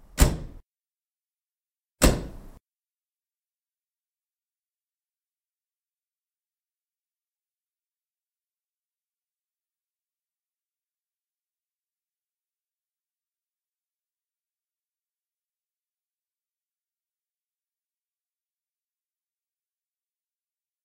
The driver side door of an MG-B being closed.
Recorded with a Marantz PMD-661 with built-in microphones for A Delicate Balance, Oxford Theatre Guild 2011.